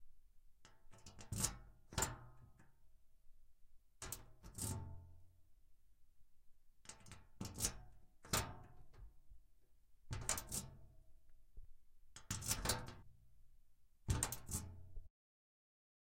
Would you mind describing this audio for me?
Key locking and unlocking a metal filing cabinet. Recorded with an iPhone SE 2020 and a Rode VideoMic Me-L Microphone